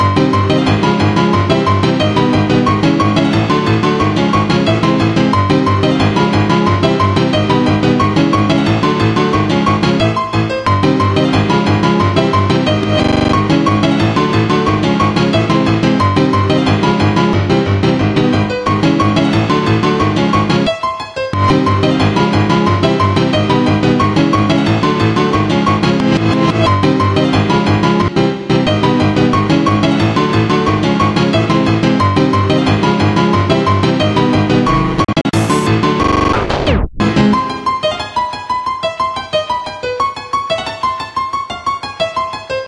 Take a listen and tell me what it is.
Stupid piano loop originally maked for hardtek song